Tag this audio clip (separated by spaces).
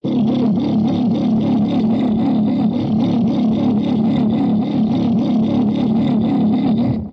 artificial electromechanics fx mechanical noise processed science-fiction sci-fi